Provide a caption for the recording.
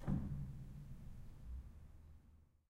Pedal 01-16bit
piano, ambience, pedal, hammer, keys, pedal-press, bench, piano-bench, noise, background, creaks, stereo
ambience, background, bench, creaks, hammer, keys, noise, pedal, pedal-press, piano, piano-bench, stereo